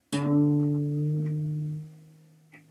Spring Sound Fx
Sound, Fx, Spring